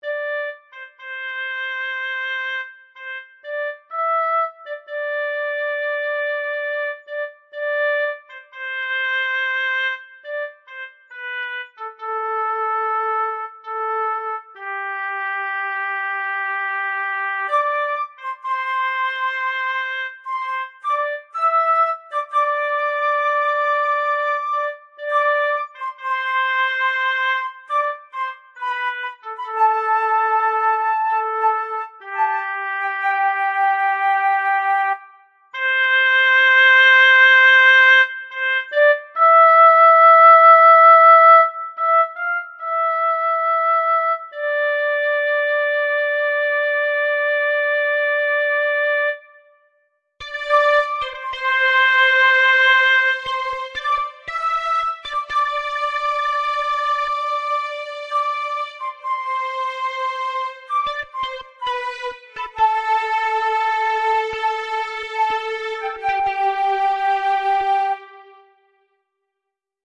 A song I made to sound like a Star Trek intro
beauty, electro, synth, synthesizer